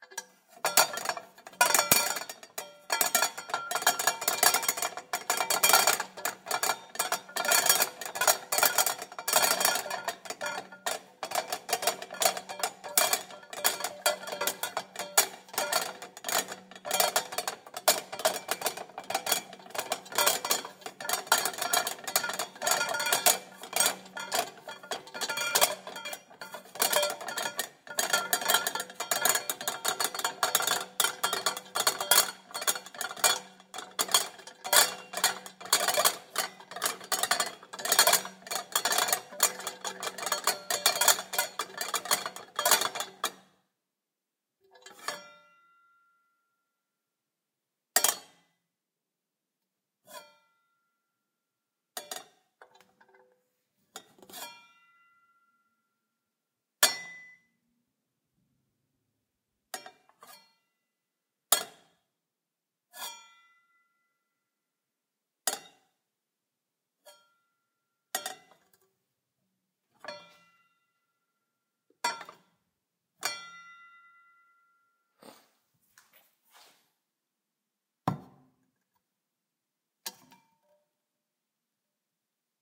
Metal pot, rattle on stove top
metal pot rattling on a stove top
pan, rattle, top, Kitchen, stovetop, pot, metal, stove, shake, cooking